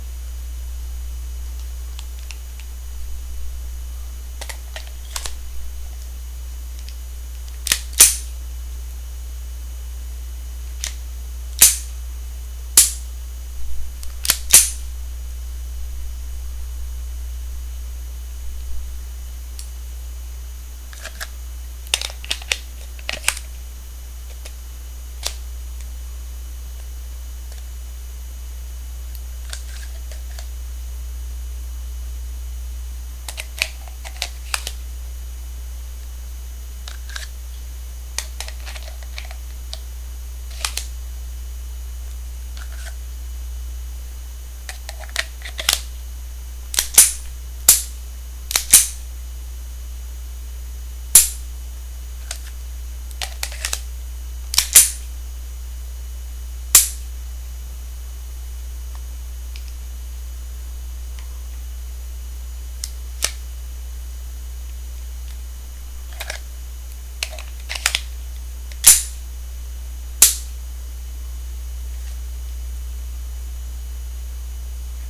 Colt 45 Handling
A Colt .45, converted to .22 with a specially made slide. I used a small desktop mic (not sure of the brand name, but it was pretty cheap), recording directly into my computer. Recorded in a small room. Cocking, dry-firing, magazine removal/insertion, etc. included on the recording.
gun, handgun, firearm